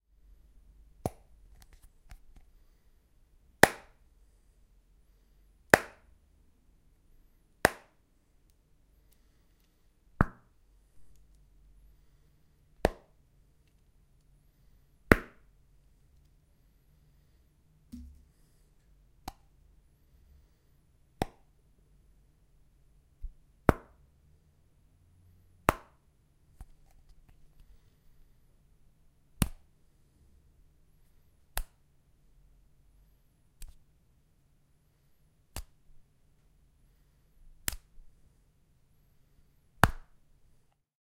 A box of Swedish snus being flicked in different ways. Several of the sounds in the clip could make for decent snare layers.